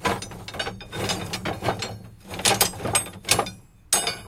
Metal handling bars in container 1
Metal handling bars in container
bars, container, handling, Metal